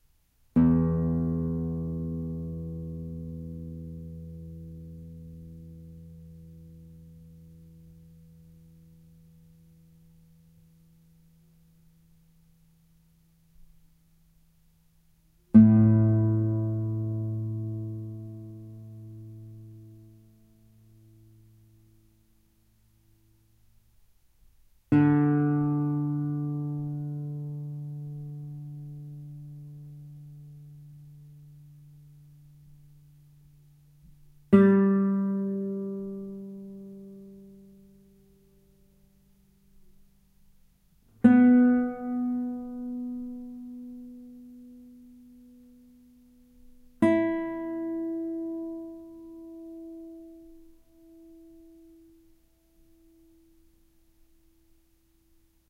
Guitar strings take1
Equipment: Tascam DR-03 on-board mics
Some nice, clean plucks on an acoustic guitar. I recorded these because I noticed the particularly nice bassy sound on the first string (E).
eadgbe, pluck, guitar, string